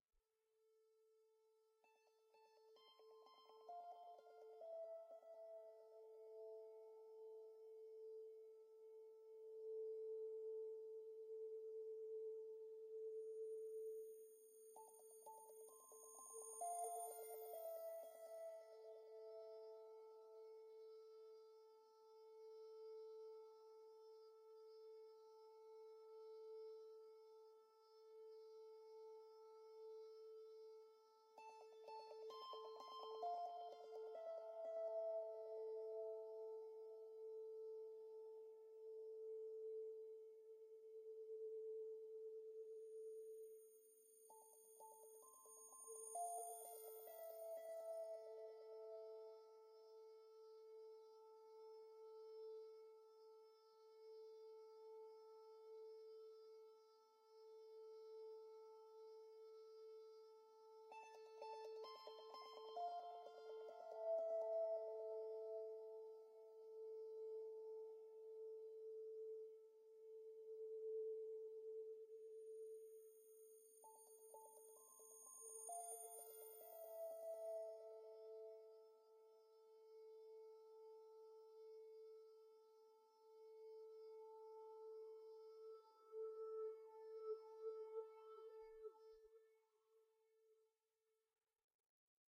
The Watcher
A Horror Film intro?
Horror, Creepy, Atmosphere, Scary, Intro, Sound-Design, Movie